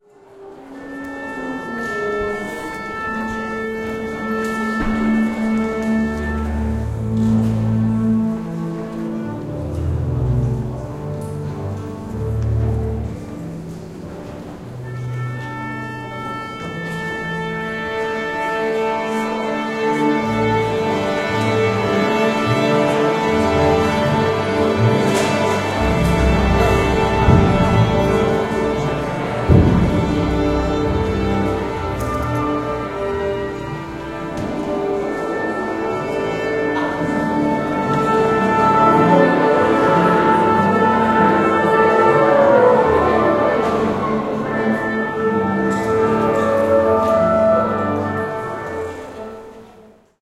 Big orchestra tuning in concert hall - 3 a's from oboe for basses, strings and winds. Olympus LS11
orchestra, oboe, strings, tuning, winds, doublebass